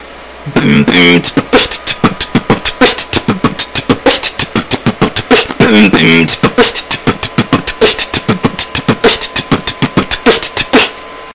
generic beatbox 1
1, beatbox, dare-19, generic